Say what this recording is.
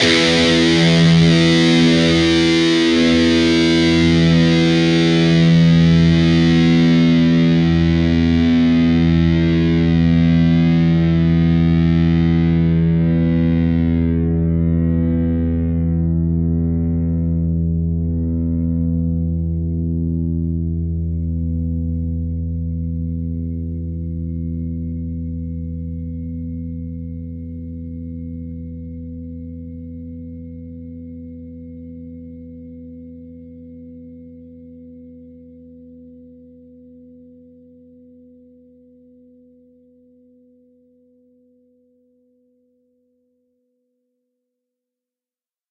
Dist Chr E oct
E (4th) string open, A (5th) string 7th fret. Down strum.
distorted, distorted-guitar, distortion, guitar-chords, rhythm, rhythm-guitar